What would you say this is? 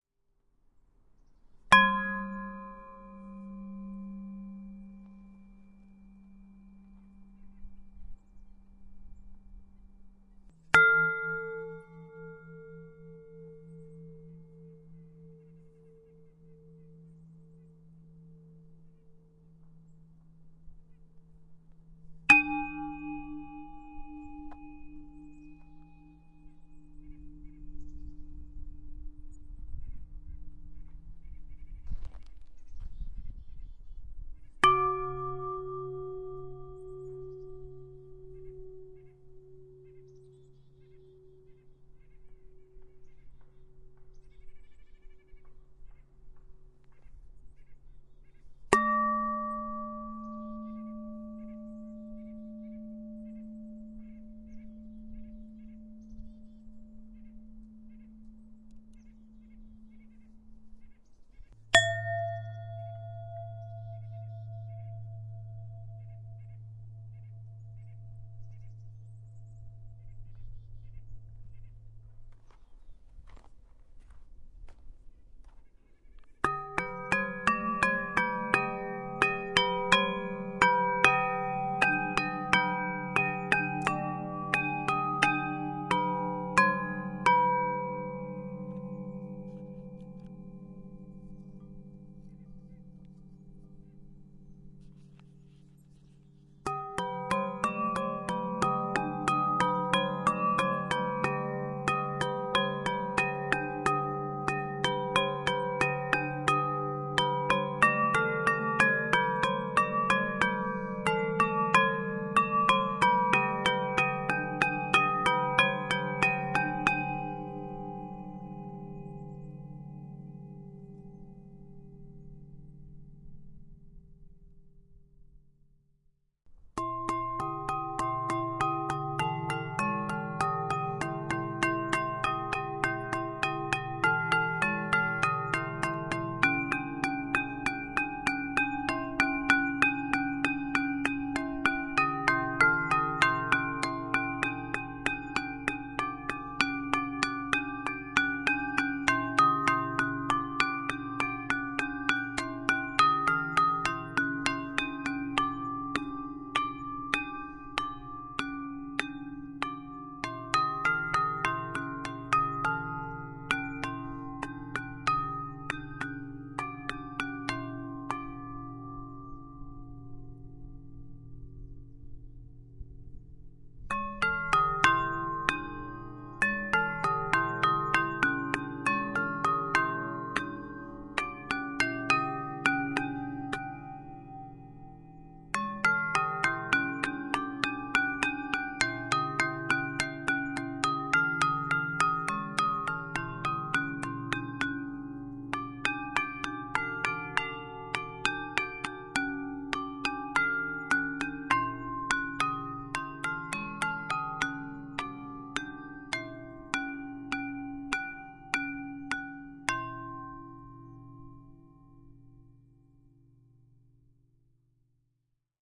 Paul Matisse' musical fence
From the sculpture park at DeCordova Museum in Lincoln, MA. A sculpture you can play. A few single hits then a few improvised melodies.
musical-fence, melodic-percussion, field-recording, Paul-Matisse